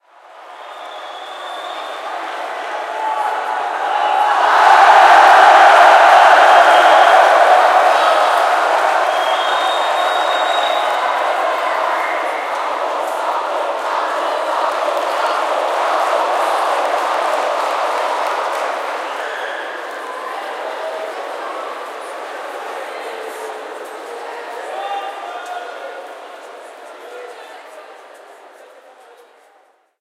Crowd Cheering - Strong Cheering and Soft Rhythmic Cheering
A sound of a cheering crowd, recorded with a Zoom H5.
audience, big, cheer, cheering, concert, crowd, entertainment, event, games, hall, loud, people, sports, stadium